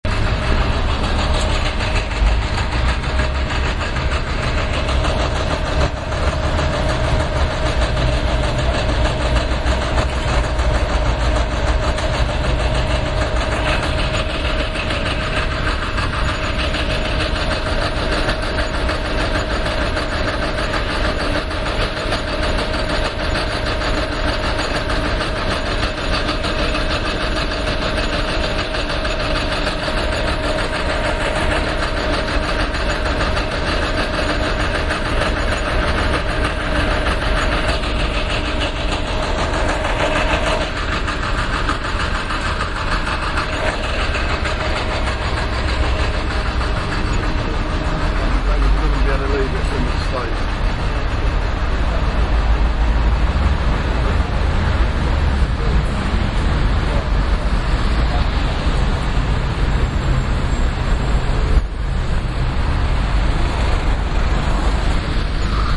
Roadworks in London
london, ambiance, field-recording, binaural, ambience